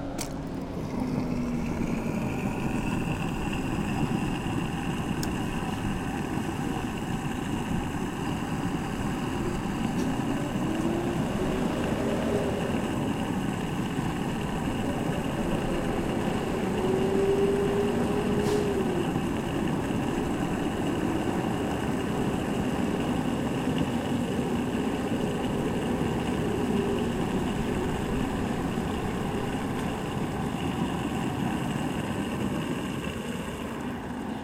Gas pumping
Gas, recording, field